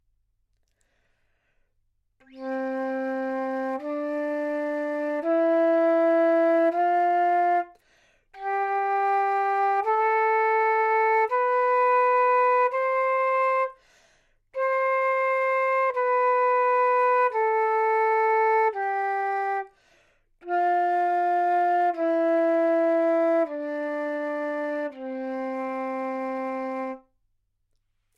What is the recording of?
Flute - C major
Part of the Good-sounds dataset of monophonic instrumental sounds.
instrument::flute
note::C
good-sounds-id::6918
mode::major
Cmajor, flute, good-sounds, neumann-U87, scale